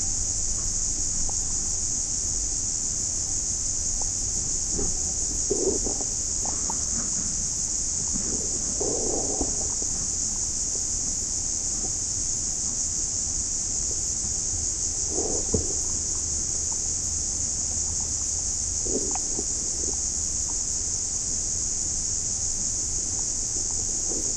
nibble bubbler
underwater, hydrophone, field-recording